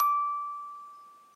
Recorded on an iPad from a musical box played very slowly to get a single note. Then topped and tailed in Audacity.
Musical-Box, pitch-d6, single-note